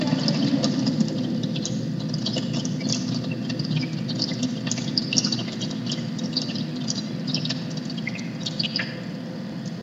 The sound of a faucet recorded using a Lenovo Yoga 11e internal microphone. Distortion added in Audacity.